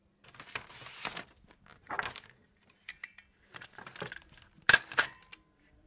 sound of a paper being stapled (mono)